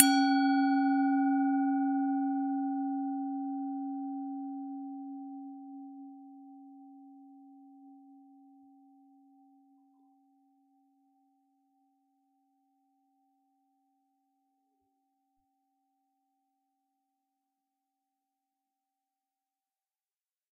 Tibetan bowl right hit.